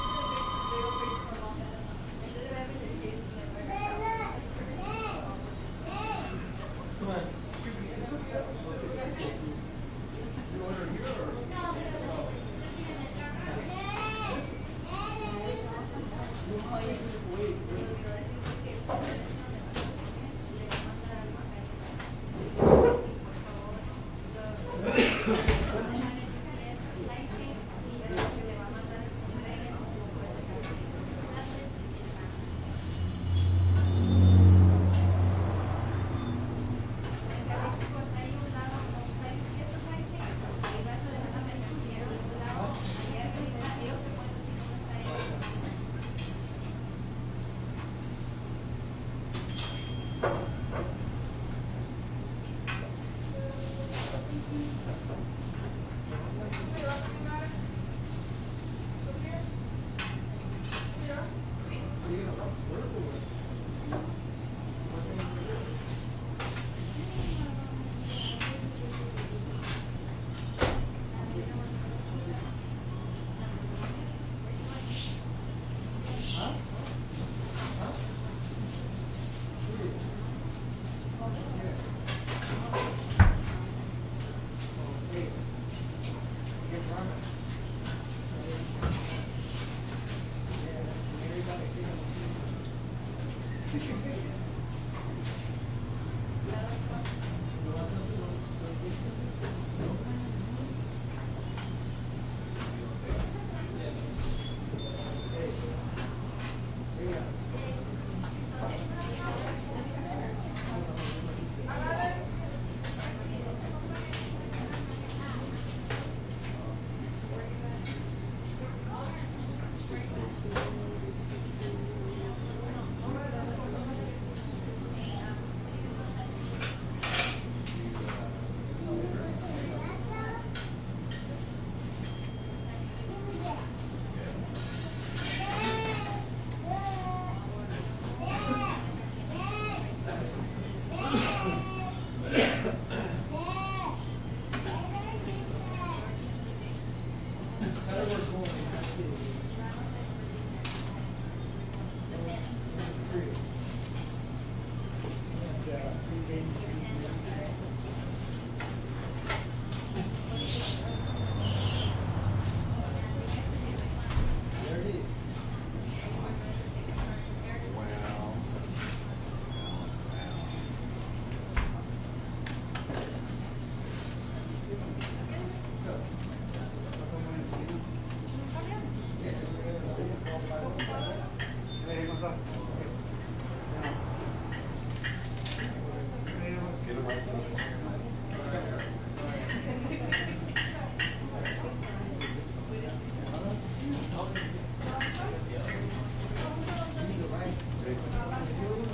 crowd light restaurant mexican +ventilation1 Calexico, USA
crowd, light, mexican, restaurant, USA, ventilation